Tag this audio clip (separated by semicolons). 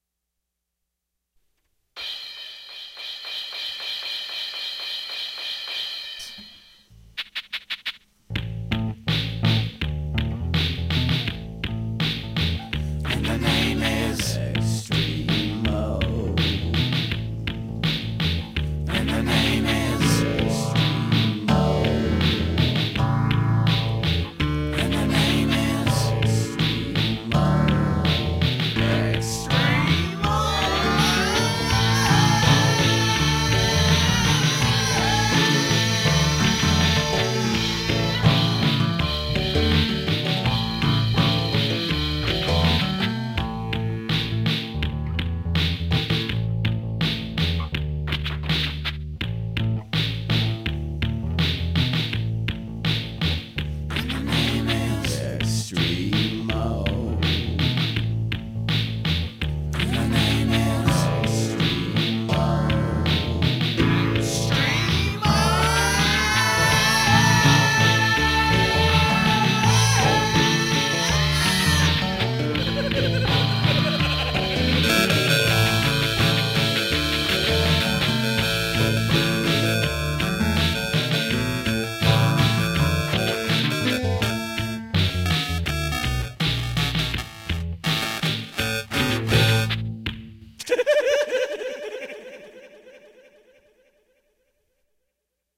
strange; clown; music